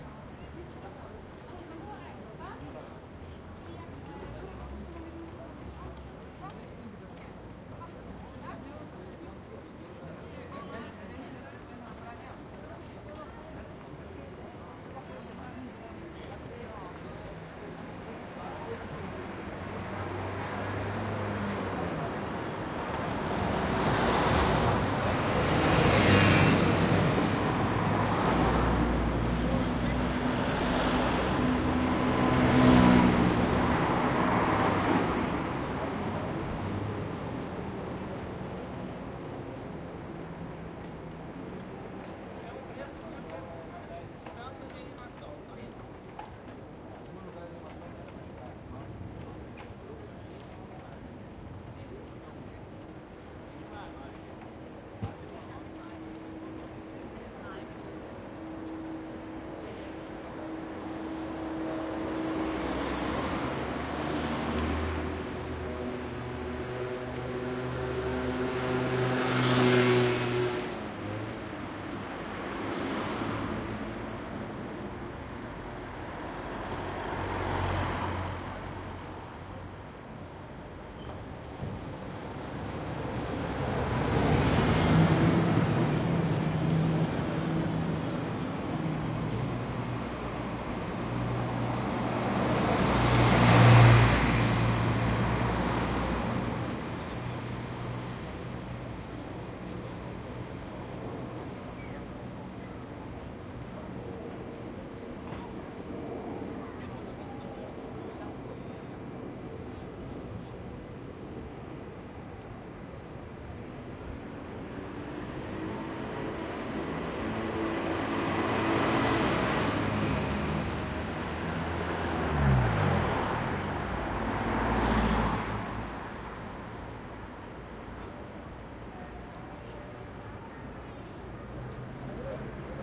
4ch surround recording of the Ulica branitelja Dubrovnika in Dubrovnik / Croatia. It is early afternoon in high summer, lots of traffic, cars scooters, buses and pedestrians, are passing.
Recorded with a Zoom H2.
These are the FRONT channels of a 4ch surround recording, mics set to 90° dispersion.